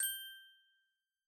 cartoon wink magic sparkle
A sparkle, wink sound. Great for games, cartoon type things.